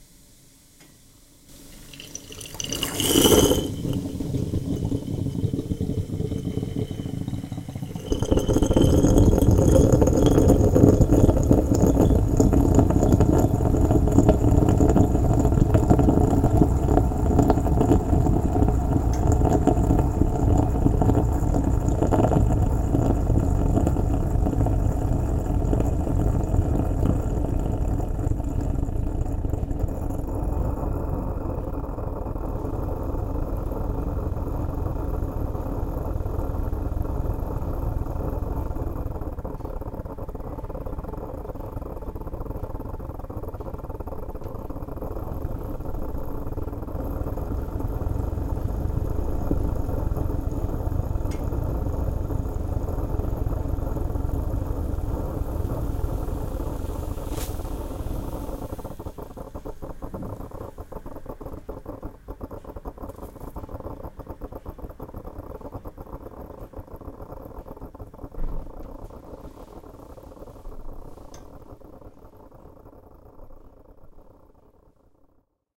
Espresso medium
Espresso, kitchen, morning, Coffee, Stove